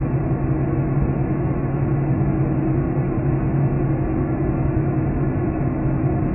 pc vent muffled
PC-Vent recorded with headset microphone.Added re-verb, hall and a filter. (can be looped)